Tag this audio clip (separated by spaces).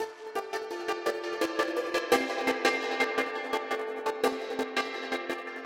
kim idm asian ambient melody